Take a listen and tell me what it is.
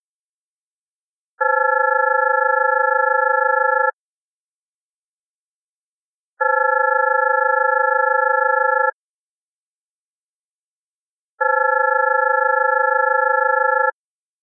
Telefono - Pure Data
effect, fx, phone, ring, sound